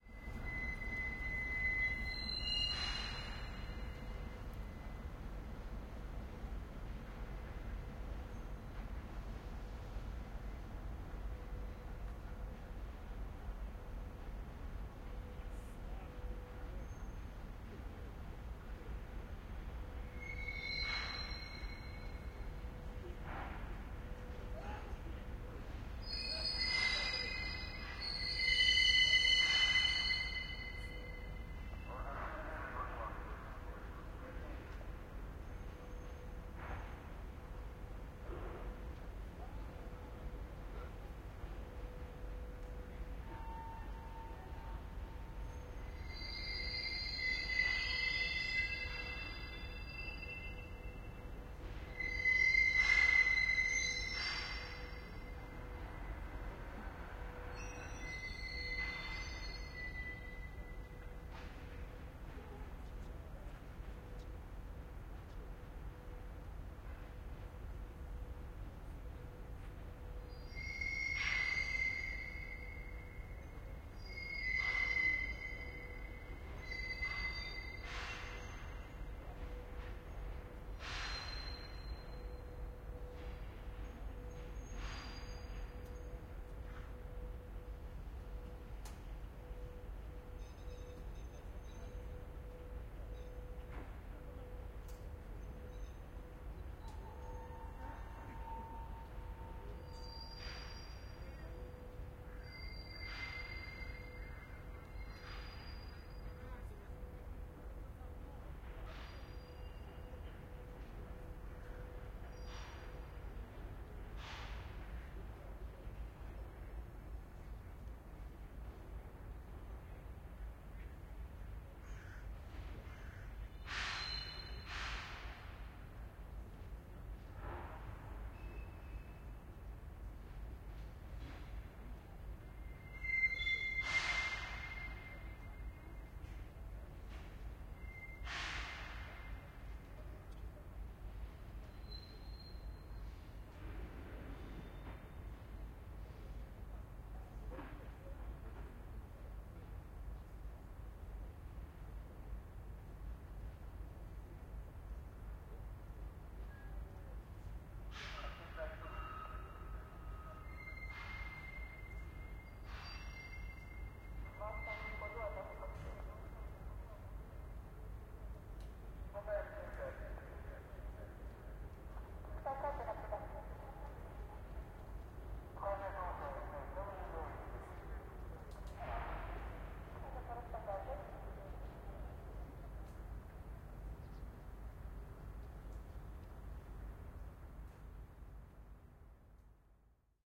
cl yard01
classification yard ambient, sounds of brakes, Song of railway wheels.distant voices of workers.
yard, railway, classification, brakes